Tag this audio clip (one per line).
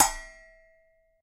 cymbal
splash
percussion
perc